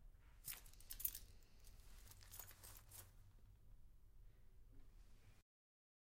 Keys in pocket
Keys, chiavi, pocket, tasca